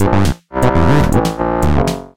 acid test
simple acid loop made with reaper and tb303 emulator and analog drum synth
acid, reaper, acid-house